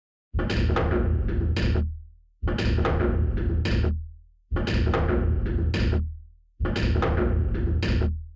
Woody industrial bass loop (115bpm)
Loop made by putting a resonator and lots of other processing on the chopped up sound of dropping a pencil in a grand piano